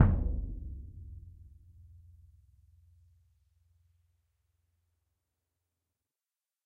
Symphonic Concert Bass Drum Vel22
Ludwig 40'' x 18'' suspended concert bass drum, recorded via overhead mics in multiple velocities.
bass, concert, drum, orchestral, symphonic